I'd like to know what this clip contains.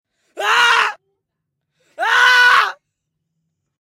Grito/Efeito sonoro gravado nos estúdios de áudio da Universidade Anhembi Morumbi para a disciplina "Captação e Edição de áudio" do cruso de Rádio, Televisão e internet pelos estudantes: Bruna Bagnato, Gabriela Rodrigues, Michelle Voloszyn, Nicole Guedes, Ricardo Veglione e Sarah Mendes.
Trabalho orientado pelo Prof. Felipe Merker Castellani.
assustada, grito, medo